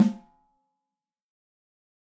This sample pack contains 109 samples of a Ludwig Accent Combo 14x6 snare drum played by drummer Kent Breckner and recorded with eight different microphones and multiple velocity layers. For each microphone there are ten velocity layers but in addition there is a ‘combi’ set which is a mixture of my three favorite mics with ten velocity layers and a ‘special’ set featuring those three mics with some processing and nineteen velocity layers, the even-numbered ones being interpolated. The microphones used were a Shure SM57, a Beyer Dynamic M201, a Josephson e22s, a Josephson C42, a Neumann TLM103, an Electrovoice RE20, an Electrovoice ND868 and an Audio Technica Pro37R. Placement of mic varied according to sensitivity and polar pattern. Preamps used were NPNG and Millennia Media and all sources were recorded directly to Pro Tools through Frontier Design Group and Digidesign converters. Final editing and processing was carried out in Cool Edit Pro.